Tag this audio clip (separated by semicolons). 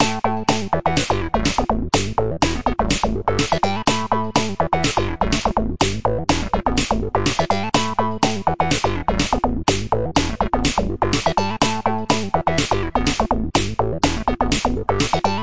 theme; game; soundtrack; background